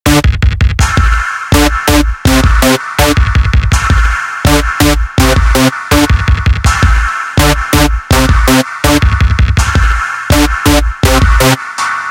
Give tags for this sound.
dance
sci-fi

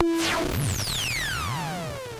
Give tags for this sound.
symetrix-501
tr-8
future-retro-xs
tube
metasonix-f1
bongo

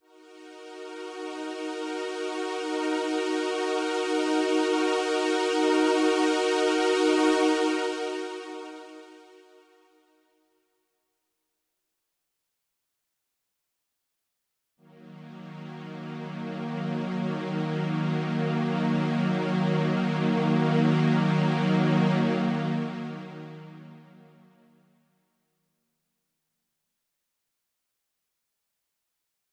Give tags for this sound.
pads
synthesized

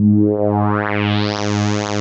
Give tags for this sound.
multisample
resonance
sweep
synth

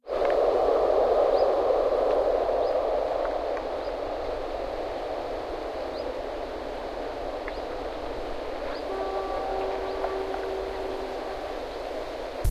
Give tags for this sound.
Llobregat
bird
birdsong
Deltasona
mosquiter
nature

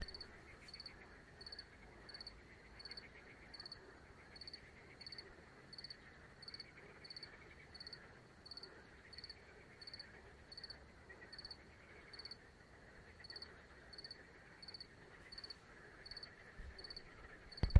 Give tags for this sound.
chirp
Cricket

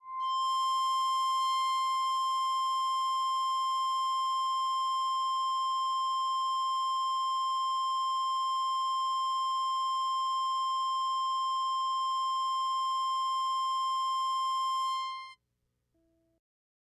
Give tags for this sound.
multisample
ambient
ebow-guitar
drone
c5
melodic

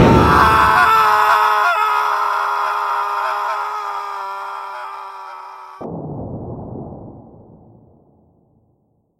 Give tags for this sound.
ahhhhhh
cannon
man
projectile
shot

